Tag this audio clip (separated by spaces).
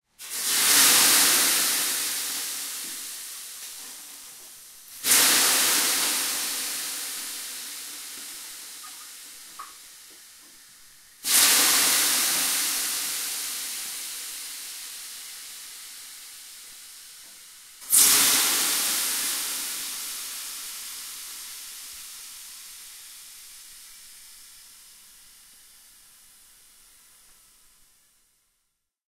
field-recording hot burning fire sauna